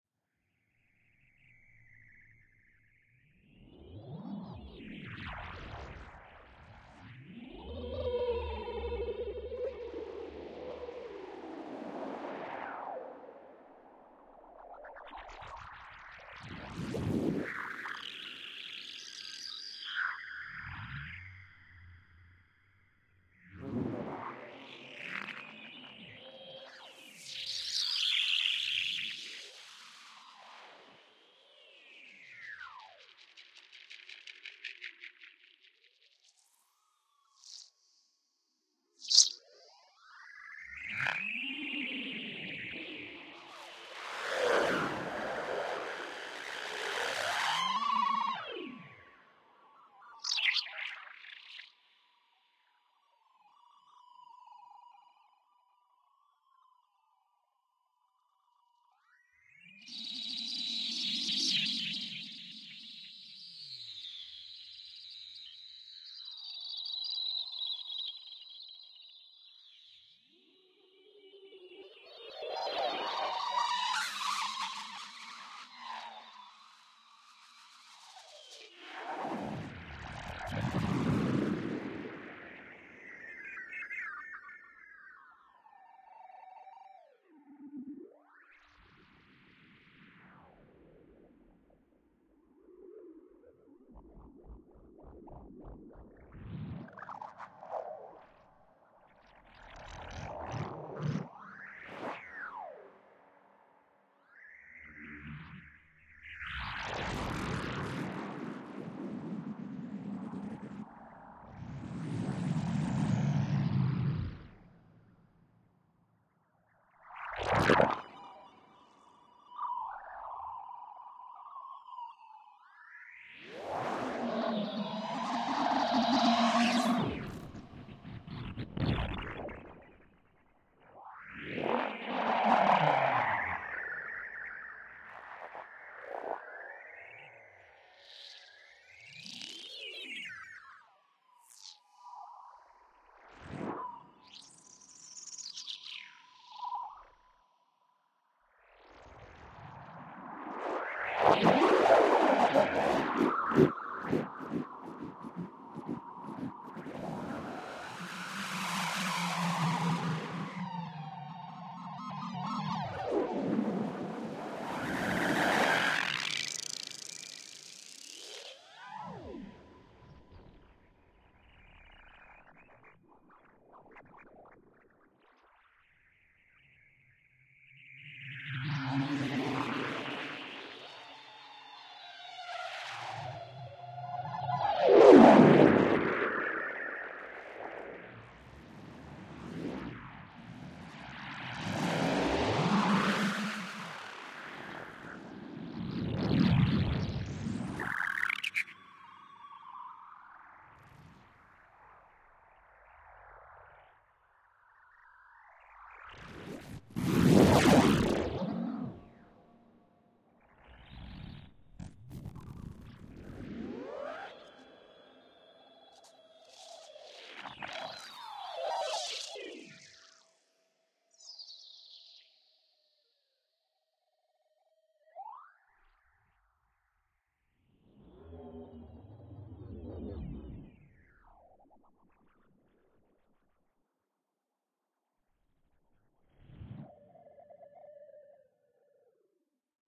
ESERBEZE Granular scape 43
16.This sample is part of the "ESERBEZE Granular scape pack 3" sample pack. 4 minutes of weird granular space ambiance. Close encountering with aliens.
effect, space